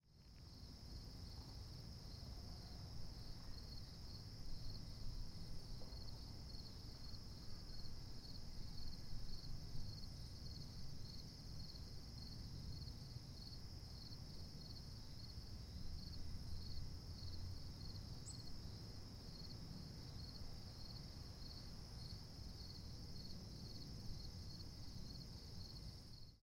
LARGE FIELD LATE NIGHT DISTANT TOWN REAR ST 01
Summer night in a field in suburbs with crickets 11pm back pair of Samson H2 surround mode - (two stereo pairs - front and back) low level distant sound of town and highway
crickets, summer